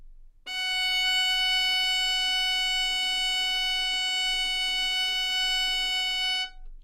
overall quality of single note - violin - F#5
Part of the Good-sounds dataset of monophonic instrumental sounds.
instrument::violin
note::Fsharp
octave::5
midi note::66
good-sounds-id::1461
dynamic_level::mf
Fsharp5, good-sounds, multisample, neumann-U87, single-note, violin